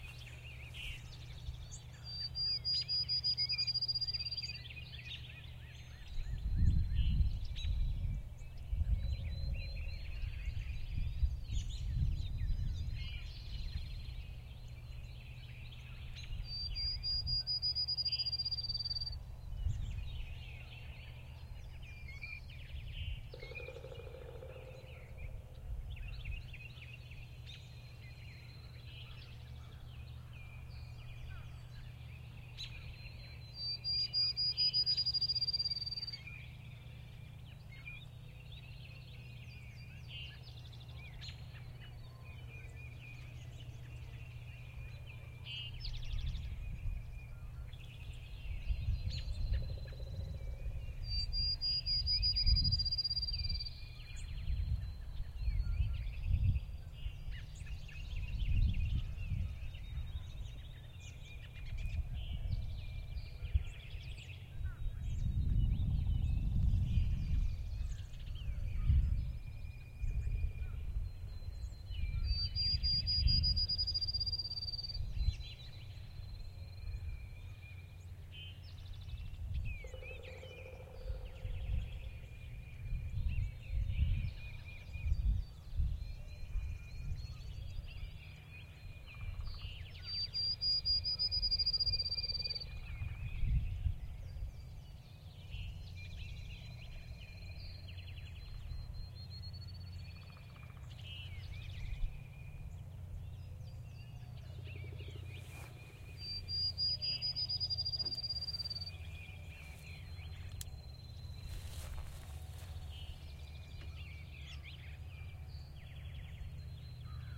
An early morning recording made at 7:30AM on Sunday April 2nd, 2017 in a large field bordered by heavy woods....beyond the woods is a large lake and, with headphones on, you might be able to hear the sound of boats on the lake.
It was sunny, BUT, a typical COLD early Spring Day. Temp was 43 degrees with a slight breeze making it feel like 37.
BUT, that did not stop the birds from putting on a chorus. Recording made with a Sound Devices 702 and the amazing, Audio-Technica BP4025 stereo microphone.
Enjoy this shot of nature waking up.